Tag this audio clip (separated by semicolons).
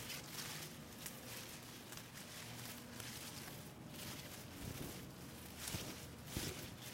footsteps; stomping; walking